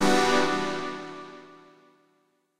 music game, dun 1
Music created in Garage Band for games. A dun-like sound, useful for star ranks (1, 2, 3, 4, 5!)